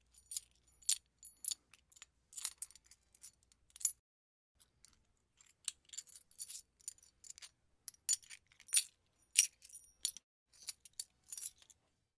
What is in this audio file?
Handling large coins
(Recorded at studio with AT4033a)